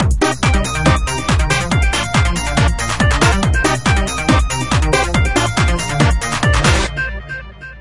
Land of Sand 5
This is the oscillator drum with the bassline. It only has the softsynth and the house key with it. Some percussion was added for a full feeling.